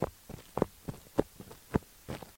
rub beat2
a set of samples created using one household item, in this case, bubblewrap. The samples were then used in a composition for the "bram dare 2"
it beats watching telly.........